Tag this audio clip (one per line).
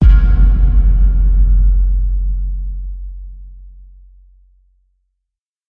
bass
dismay
eerie
emphasis
hit
horror
jump
low
oh-dear
scare
startle
stinger